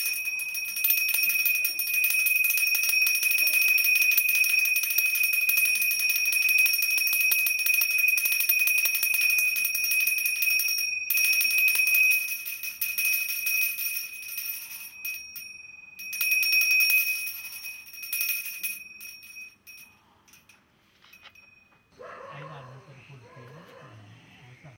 Sound of a Copper Bell.